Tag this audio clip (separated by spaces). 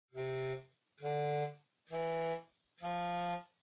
steps walk